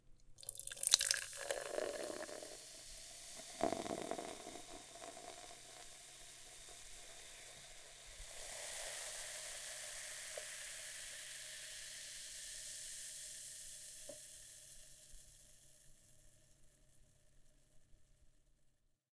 Pouring a fizzy drink
Pouring a can of fizzy drink/soda/beer into a glass. Recorded on an H5
beer, drink, lager, water, sparkling, pouring, can, glass, CO2, ale, fizzy, pour, carbonated, carbon-dioxide, coca-cola, beverage, coke